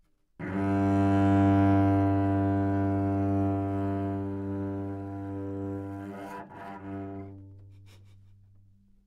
overall quality of single note - cello - G2
Part of the Good-sounds dataset of monophonic instrumental sounds.
instrument::cello
note::G
octave::2
midi note::31
good-sounds-id::2628
Intentionally played as an example of bad-timbre-errors
good-sounds, cello, G2